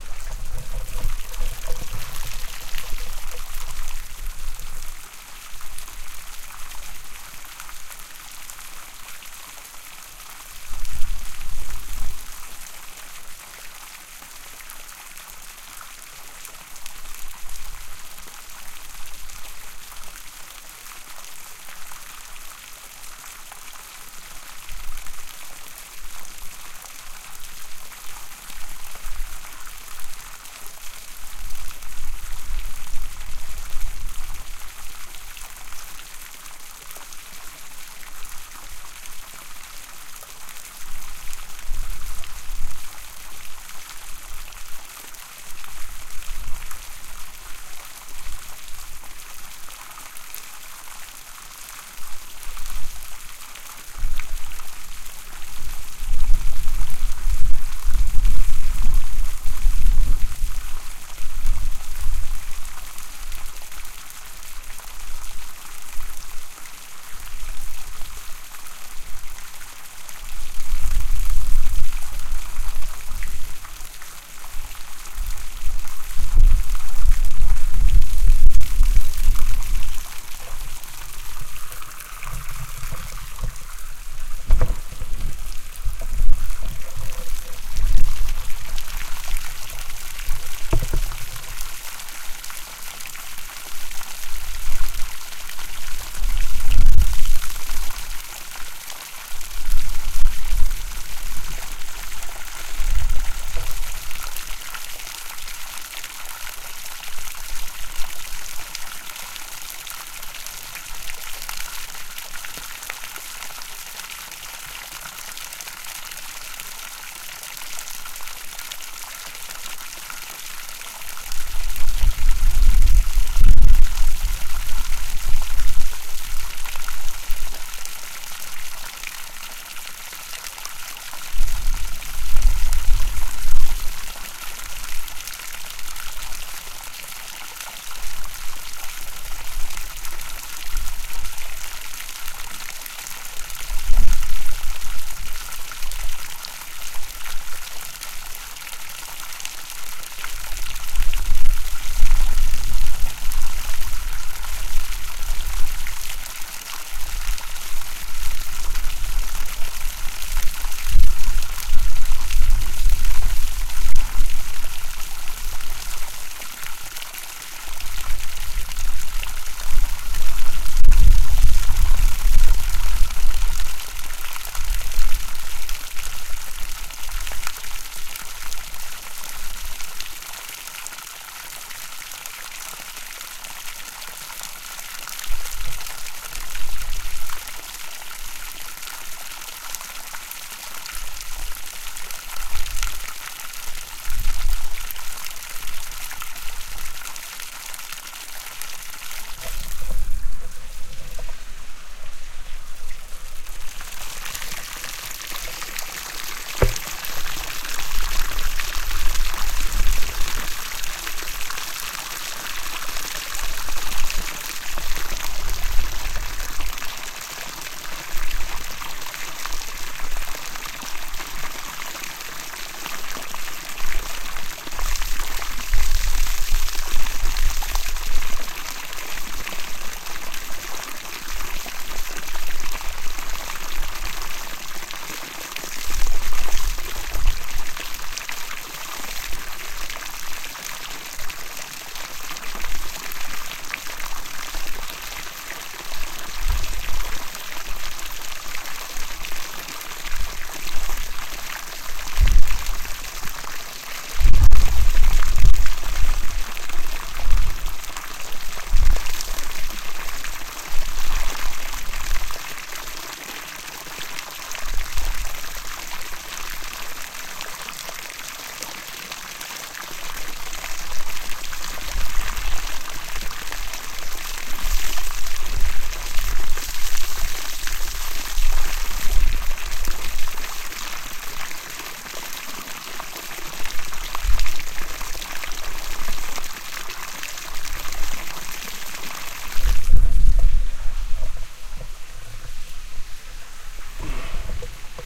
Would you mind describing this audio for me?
water stream
Water falling from a little fountain into a pond.